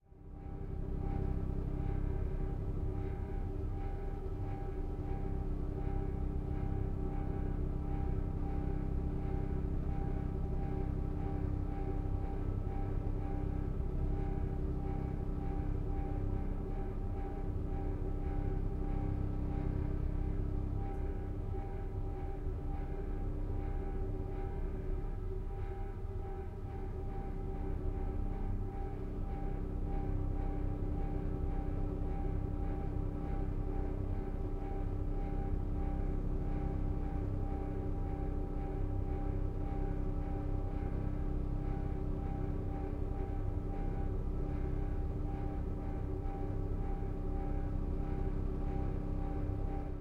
A recording of my oven interior [Zoom H2]